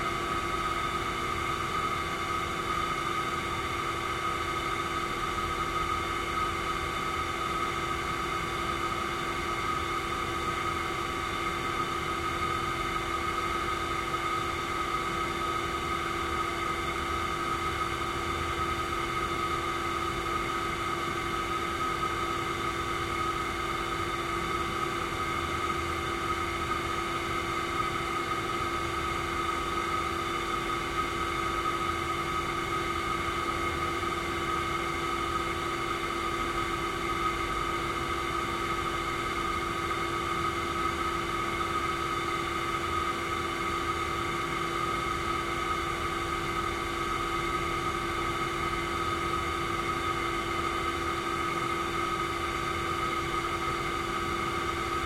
industrial steam pipes hiss hum
pipes, steam, hiss, industrial, hum